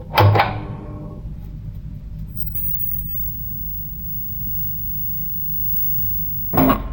Television Switch High Ringing
Switching a TV set on. High ringing sound and other noises.
Recorded with Edirol R-1.
frequency, high, set, switched, noise, button, tv, static, television, switching, switch, ringing